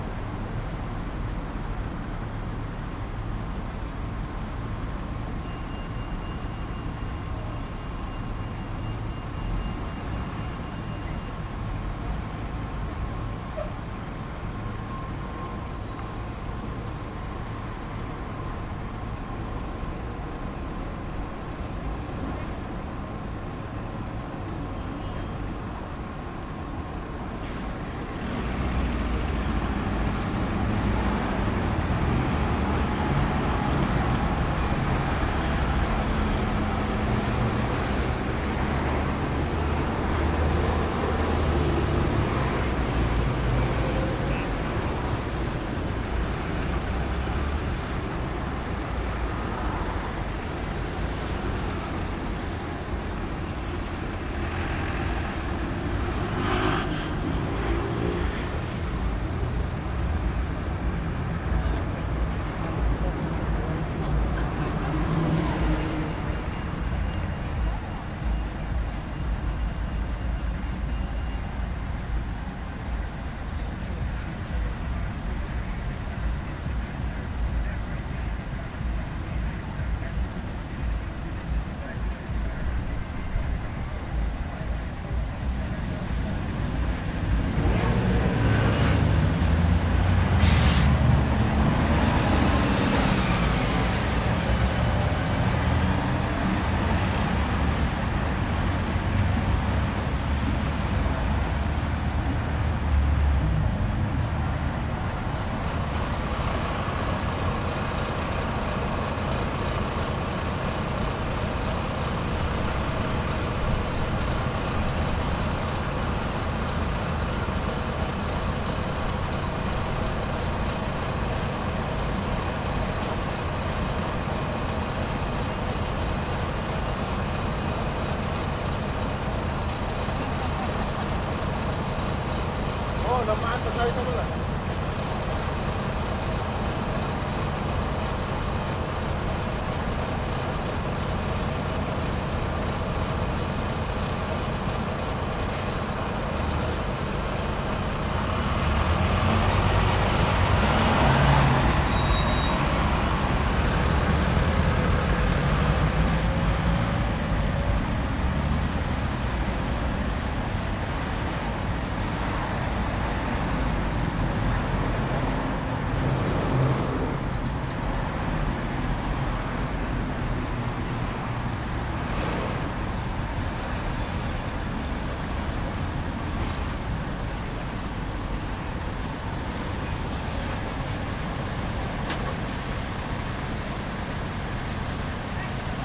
Road Traffic near Tower of London, London
Stationary traffic, that was occasionally moving. There is a pedestrian crossing nearby that occasionally starts beeping. This was recorded on the northern edge of Tower of London on 1/10/15
ambience, cars, london, noise, ambient, people, field-recording, traffic, soundscape, lorries, street, city